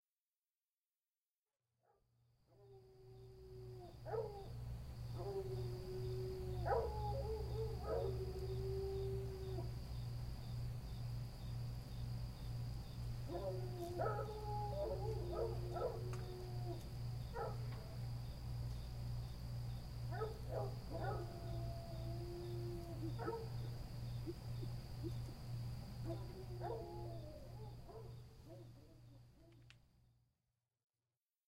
I placed a large diagphram condensor microphone outside the the studio and picked up some coon dogs in the distance. It has some crickets up closer.